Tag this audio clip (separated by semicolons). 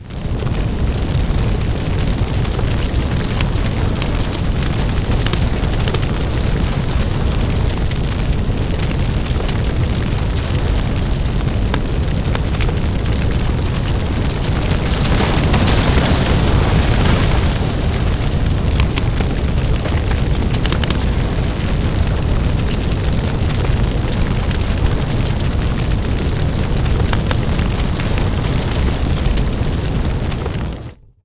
Wild-fire; Fire; Roaring-Flames; Flaming; Burning-house; House